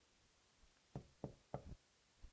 toque de puerta